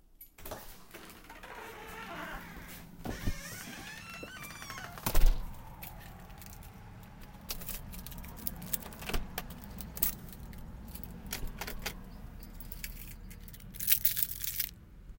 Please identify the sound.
Opening a front door from the inside, walking outside and closing the door, then locking it. Keys jingle at the end. It is a summer day outside.